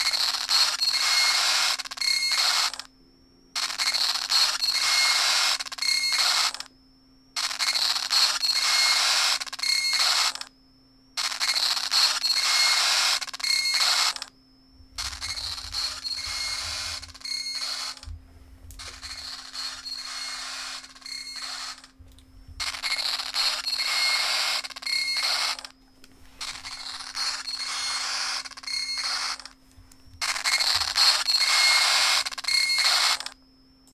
My old GameBoy Advance has started freezing sometimes, resulting in a looped glitchy noise. I recorded it at various positions ans distances around my microphone. Perhaps it'll be useful for you!